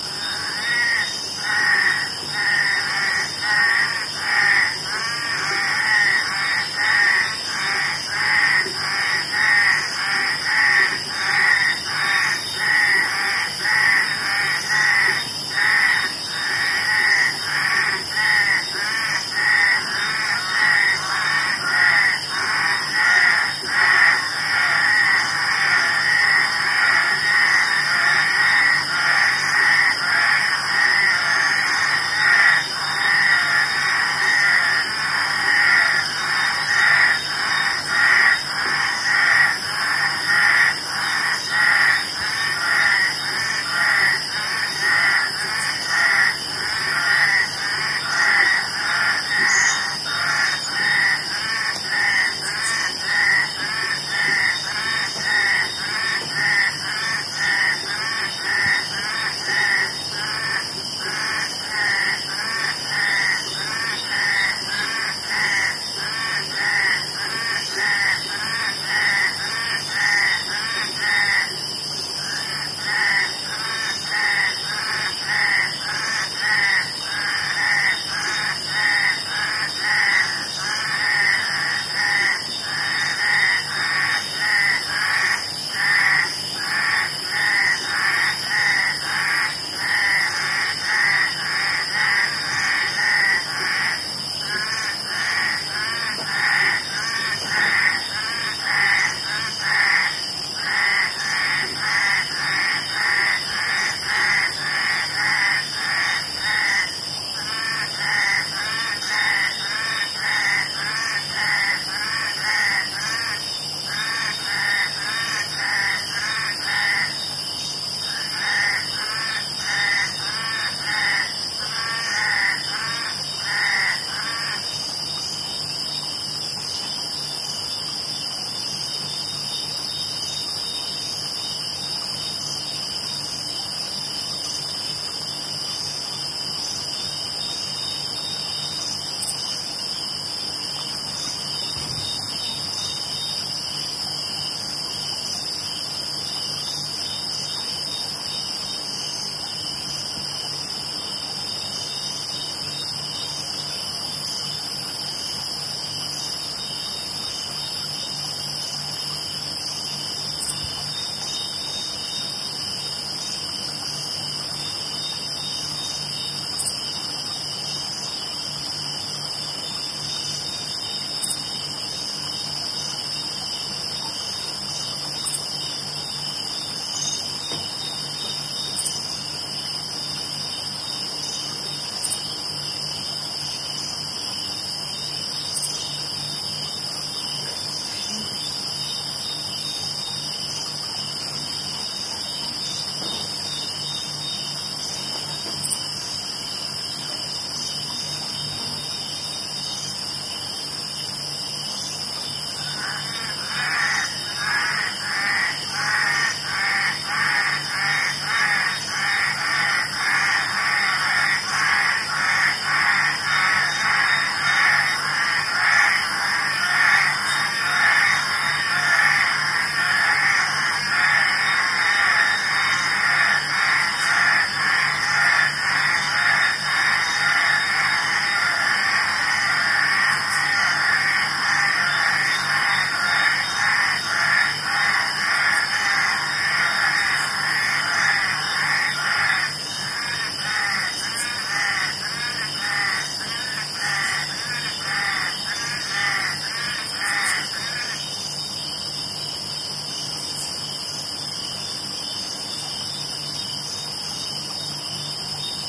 Evening time for bugs and frogs at Kruger National Park, South Africa.
field-recording, cicadas, south-africa, kruger, bugs, insects, frogs